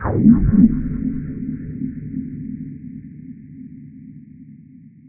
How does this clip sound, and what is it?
there is a long tune what i made it with absynth synthesiser and i cut it to detached sounds
digital, ambience, reverb, experimental, synth, atmosphere, sample, ambient, drone, space, deep, dark, horror, noise, sound-effect